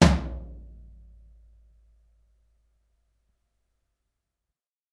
tom - Gretsch Cat Maple 14 floor - 1
Gretsch Catalina Maple floor tom. 14 inch.